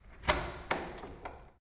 Sound produced by connecting and disconnecting a plug. Recorded with a tape recorder in the library / CRAI Pompeu Fabra University.
library, UPF-CS14, plug, campus-upf